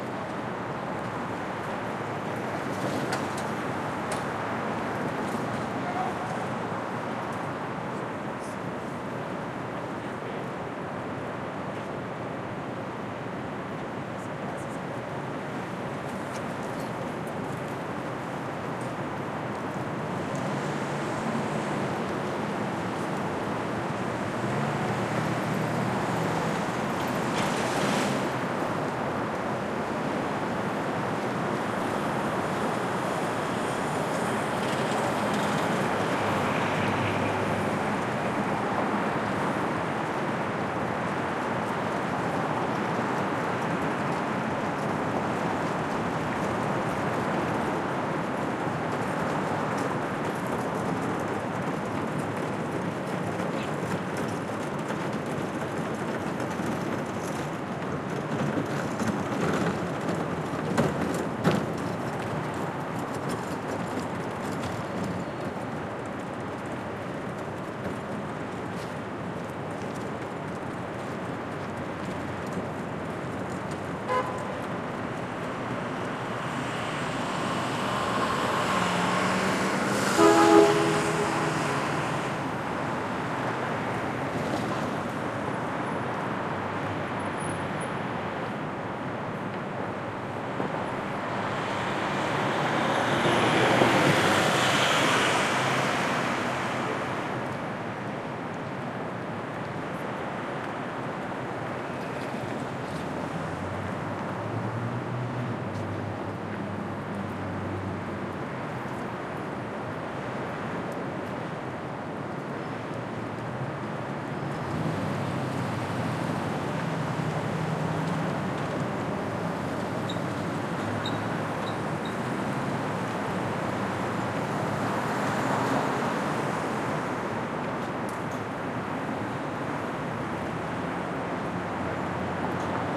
Field recording of Times Square in New York City recorded at 6 AM on a Saturday morning. The recorder is situated in the center of Times Square, some cars (mostly taxis) are underway, some (very few) people as well, cleaners and a team of subway construction workers are on the scene.
Recording was conducted in March 2012 with a Zoom H2, mics set to 90° dispersion.
ambience, ambient, atmosphere, cars, city, field-recording, mid-range, morning, New-York, noise, NY, people, soundscape, street, Times-Square, traffic